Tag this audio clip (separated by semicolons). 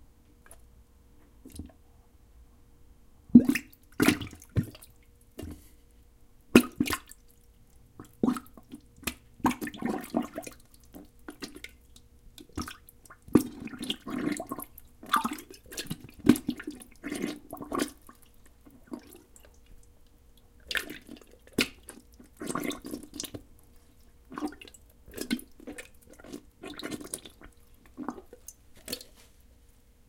water; toilet; dripping; bubbling; slurping